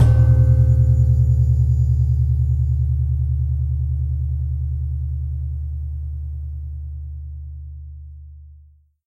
Deep Synthetic Gong.
Realized by JCG 2016